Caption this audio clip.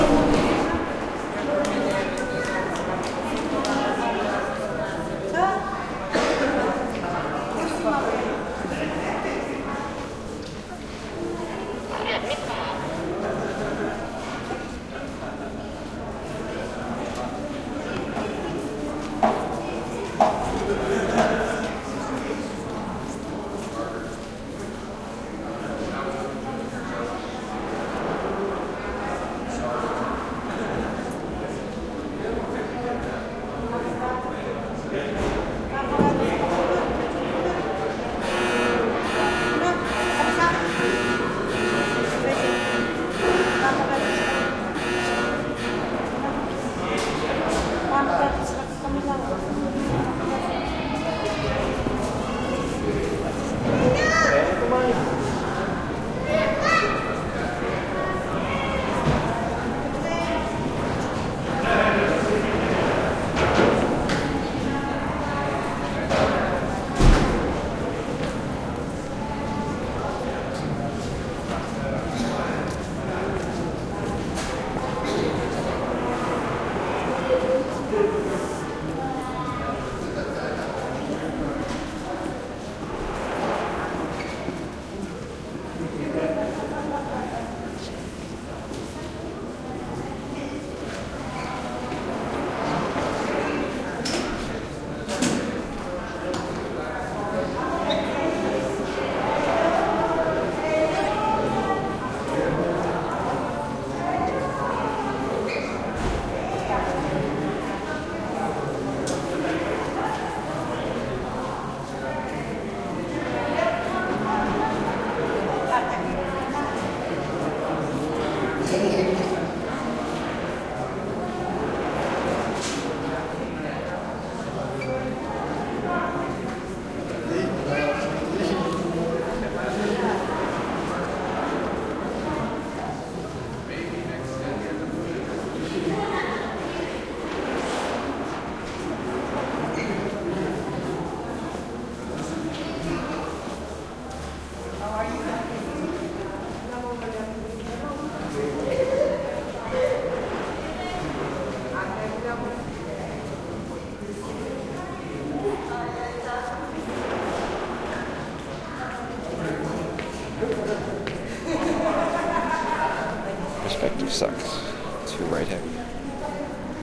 crowd medium large room small airport Nunavik echo1
crowd int medium large room small airport Nunavik echo1
Nunavik, large, crowd, airport, small, medium, int